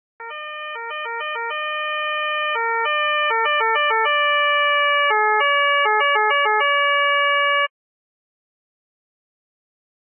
Austrian police siren.
Actually no recording but programmed in Pd.